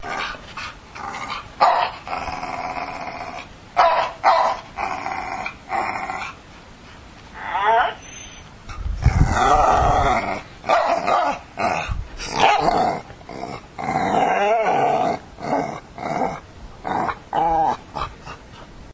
My dog, Pooh Bear being a butthole. She is demanding a dog treat. lol
barking, dog, growling, pet, woof